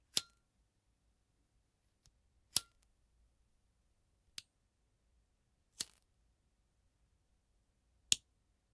This is a sound of lighter spark. Multiple takes.